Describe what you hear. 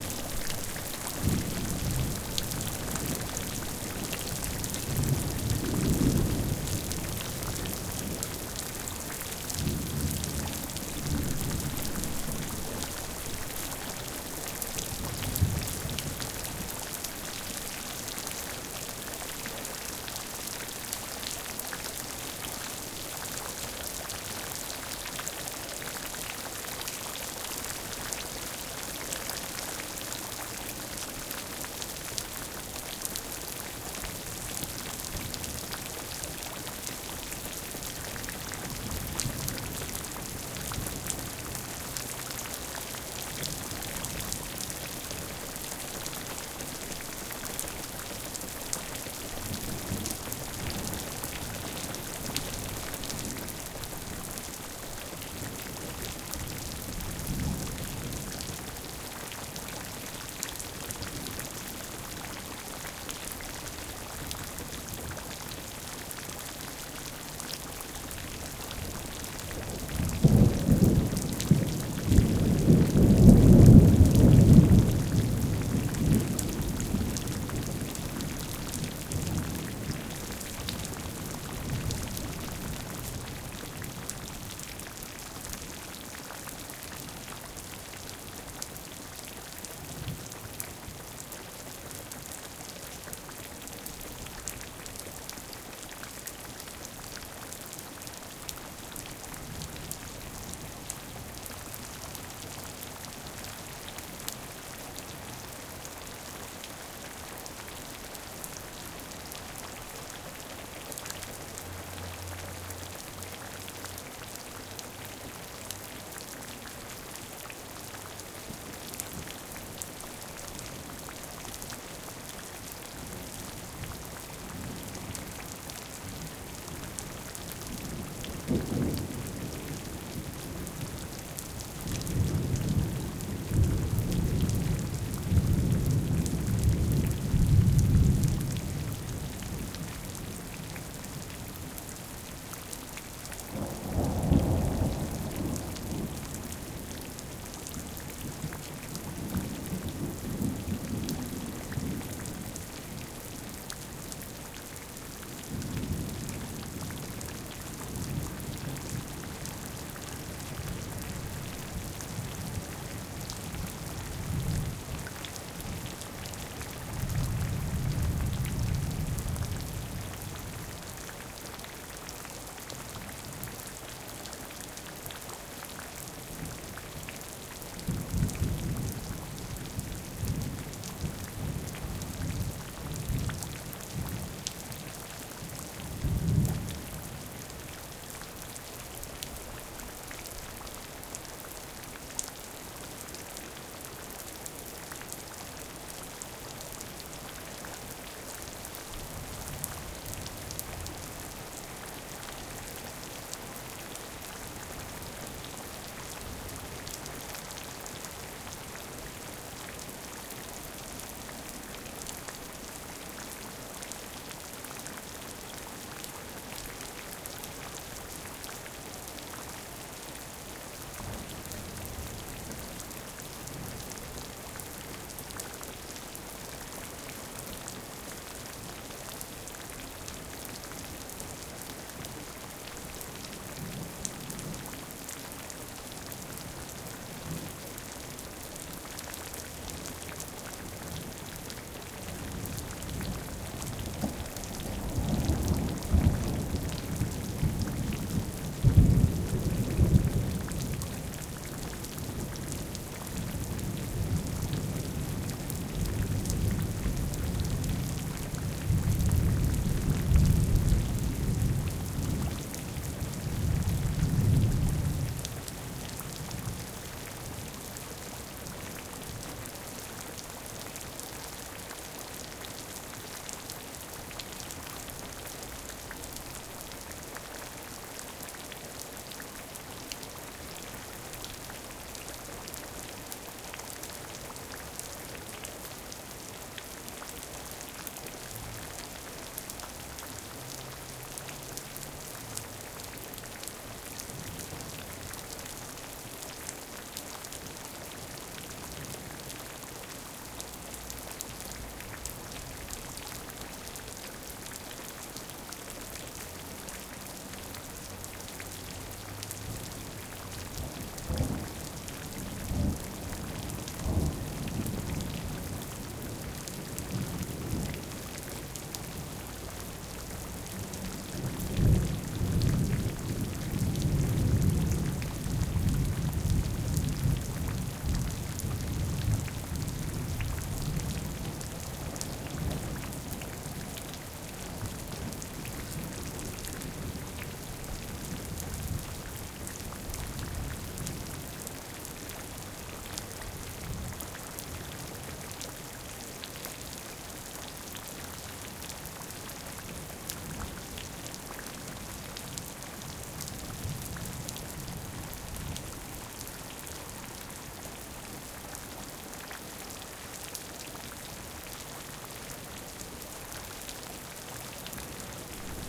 Recorded with: Zoom H6 (XY Capsule)
Rain recording that was done underneath a corrugated iron roof at night.
lightning, shower, storm, thunderstorm